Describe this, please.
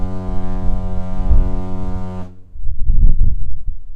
VikingLine ferryhorn
Ferry horn blask on Viking Line ferry from Kapellskär, Sweden to Mariehamn, Åland in Finland.